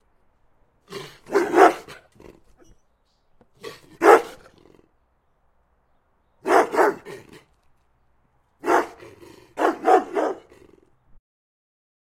Dog Barking SFX
Big Dog Barking at Me